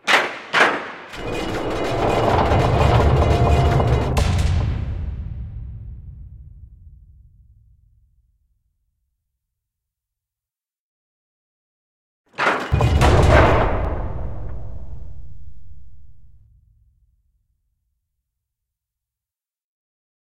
Jared's Gate to Hell
Sliding metal gate to hell.